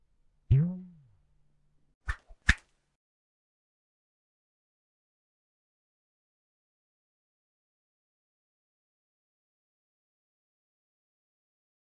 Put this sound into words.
String tightens and whips
string tightens then whips
string, bow, wire, tightening